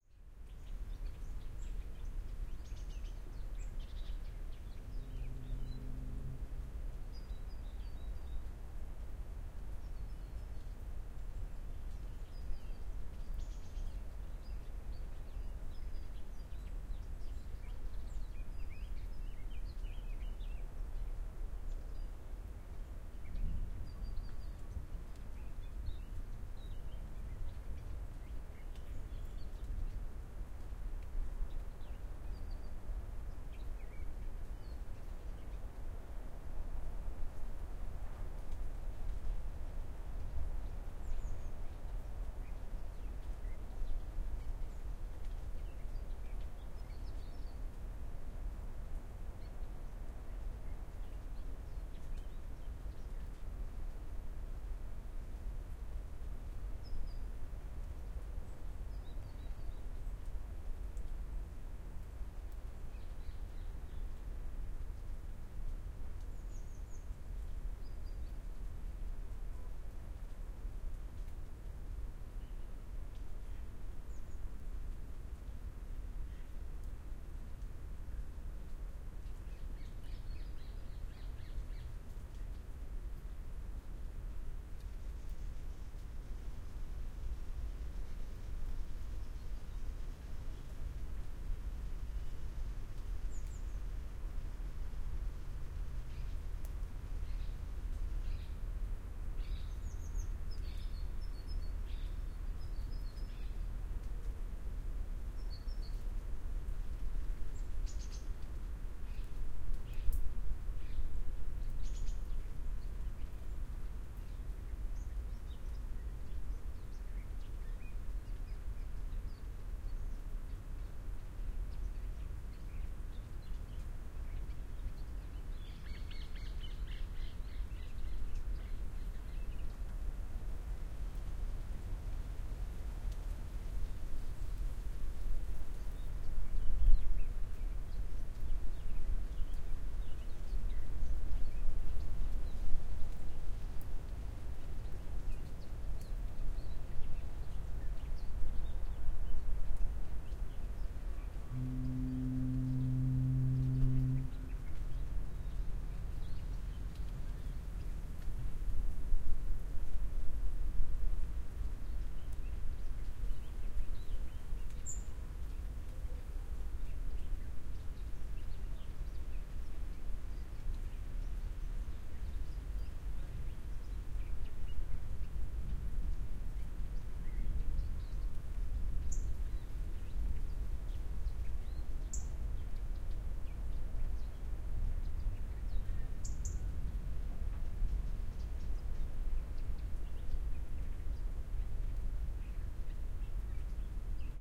Little rain, birds et siren
Field-recording, Rain